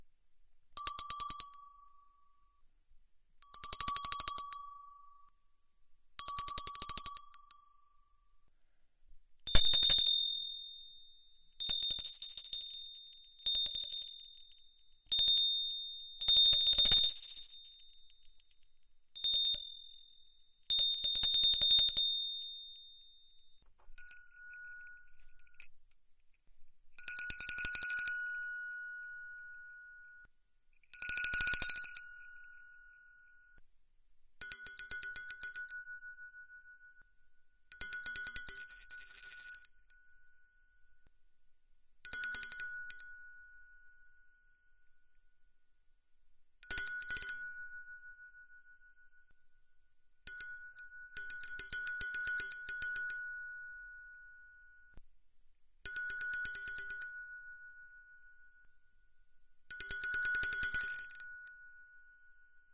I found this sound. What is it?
Small bells, various
Recorded 4 different small bells ringing.
No post-production modifications.
Recorded with my Roland R-05 recorder in my 600 pound Eckel Portable sound booth which I bought for $150 used.
Comments / suggestions for improvement welcome. Thanks
ring, ding, bells